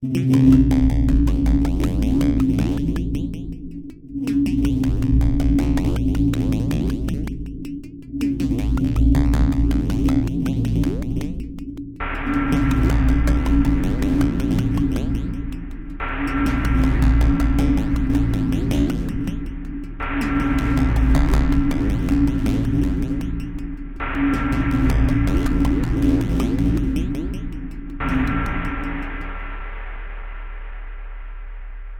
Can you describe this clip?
a dark sound with a quote of a new beginning